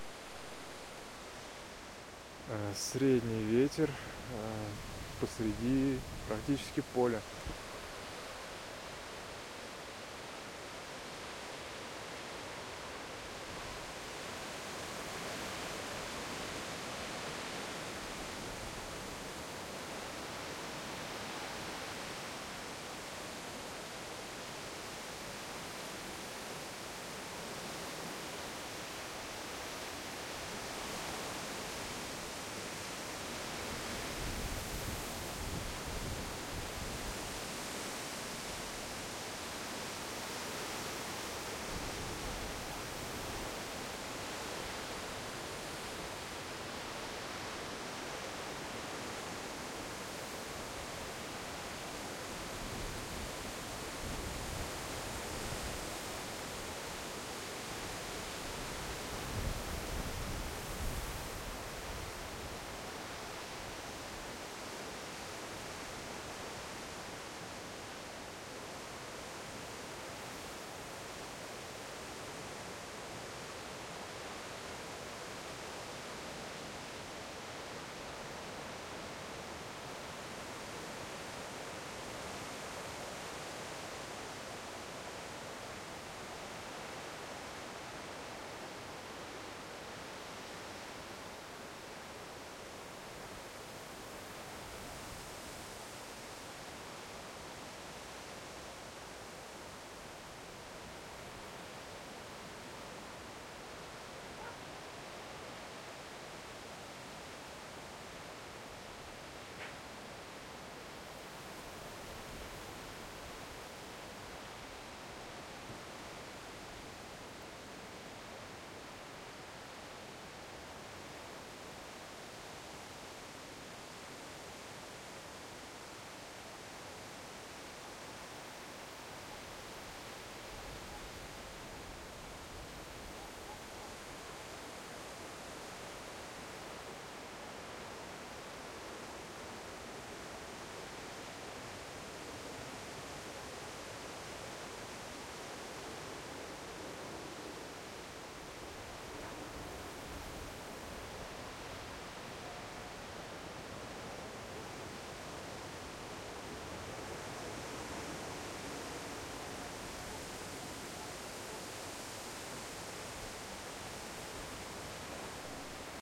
wind in the field in silent provinсial city
Wind in the small town. Sound Devices 552 and Sanken CSS-5 mic.
css-5, sanken, devices